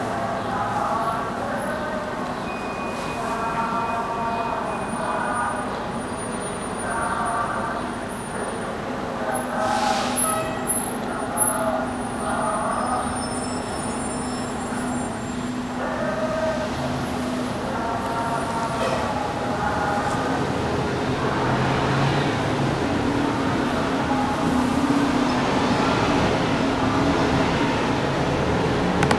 mitzvah tank on flatbush
This is a recording made in my living room of a mitzvah tank, the giant vans the Lubavichers drive around Brooklyn. They play jewish religious music out of speakers on these vans. Its a sound I always enjoyed, especially during the high holidays when there is a giant parade of them on Flatbush.
brooklyn, chant, field-recording, lubavichers